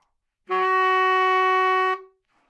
Sax Baritone - F#3
Part of the Good-sounds dataset of monophonic instrumental sounds.
instrument::sax_baritone
note::F#
octave::3
midi note::42
good-sounds-id::5269
baritone, Fsharp3, good-sounds, multisample, neumann-U87, sax, single-note